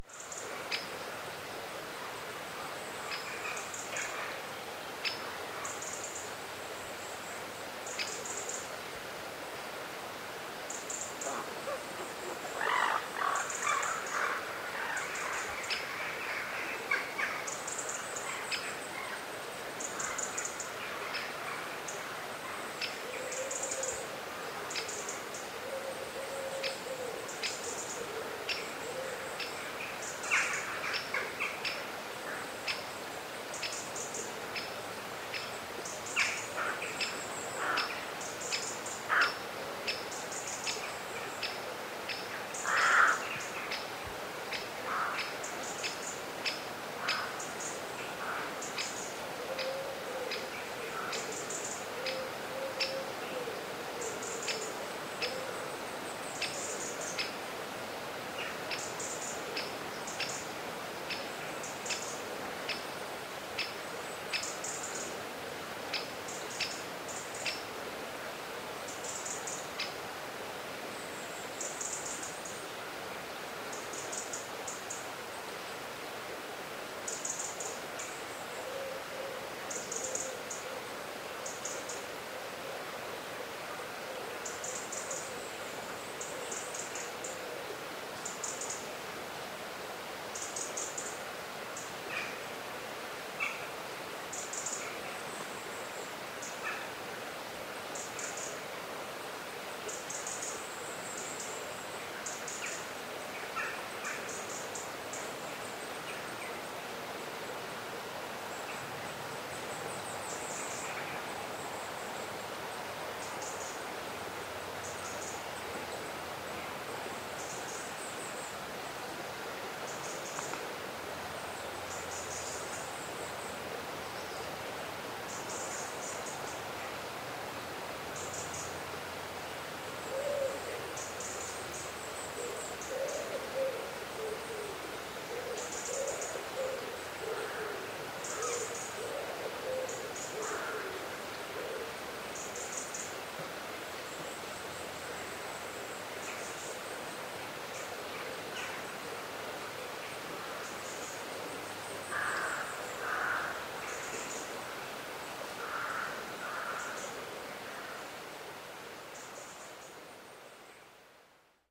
Birds Next to Water Sounds
ambiance, ambience, ambient, atmosphere, bird, bird-noise, birds, bird-song, birdsong, field-recording, forest, morning, nature, river, Scotland, spring, wildlife